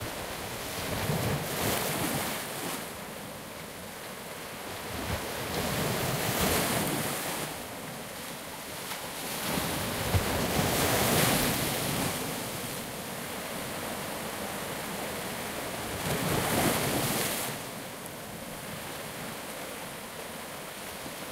Taken with Zoom H2N, the beaches of Cyprus
coast, ocean, water